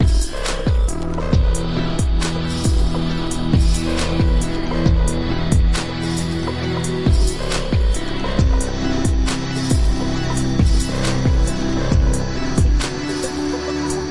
Complex Property
68bpm, E, loop, minor, music